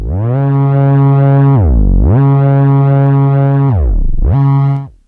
Virtual theremin sounds created with mousing freeware using the MIDI option and the GS wavetable synth in my PC recorded with Cooledit96. There was a limited range and it took some repeated attempts to get the sound to start. 6th voice option dry.
free, mousing, sample, sound, theremin